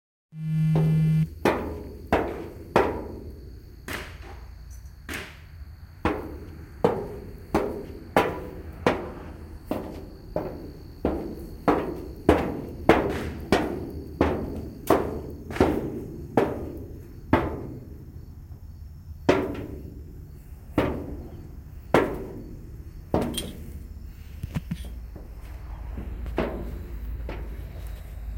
Footsteps in hard-heeled shoes on hollow metal. Sorry for the crickets in the background and my phone vibrating at the beginning.
Hey! If you do something cool with these sounds, I'd love to know about it. This isn't a requirement, just a request. Thanks!